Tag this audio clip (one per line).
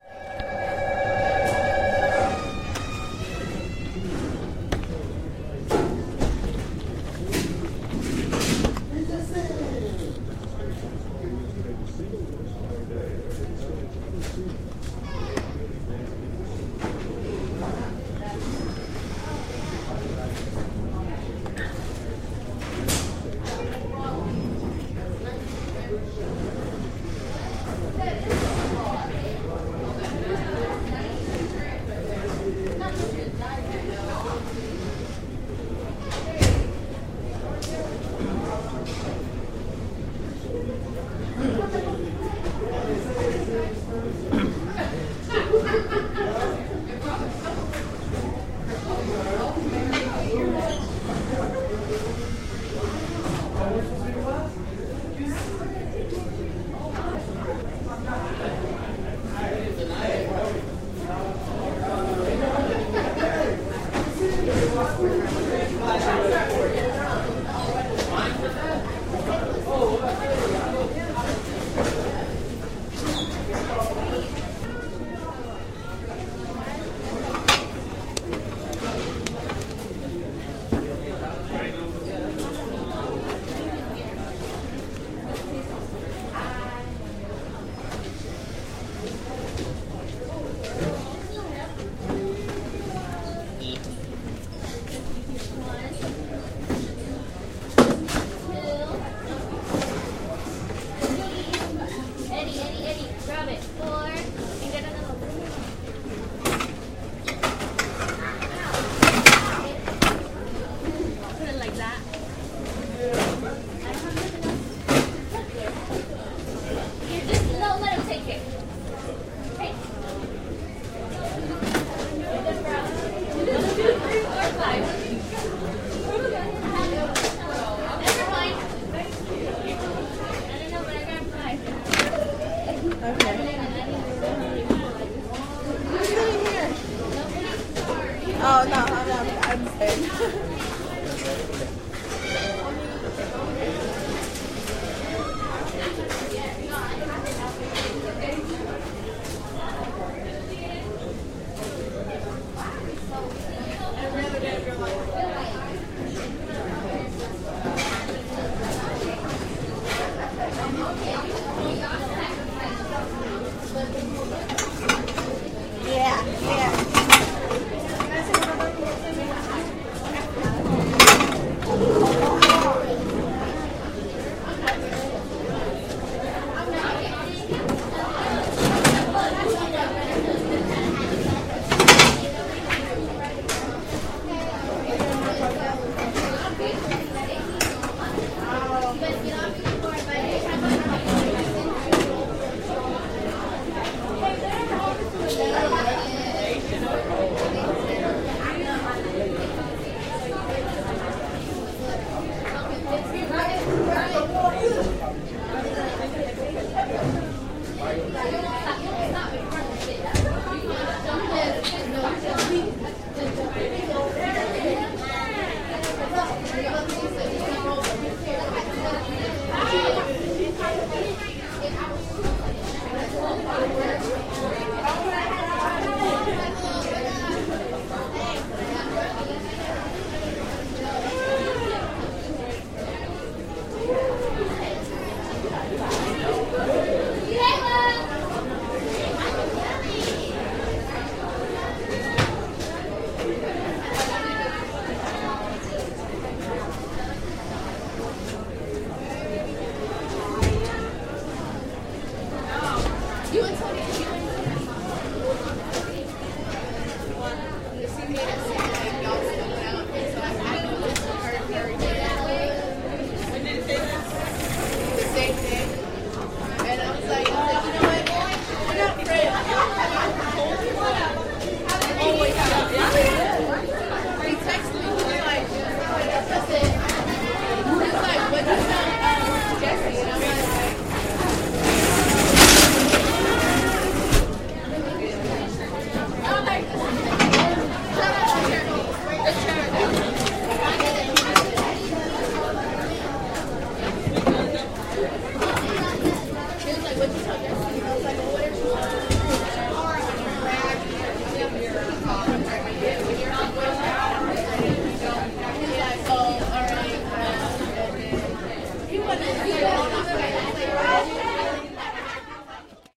lunch
lunchroom
room
squeak